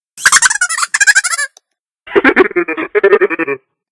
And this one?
Laughing Robot/Monster
The laugh of something strange.
comedy, creature, funny, horror, laugh, laughing, monster, robot, scary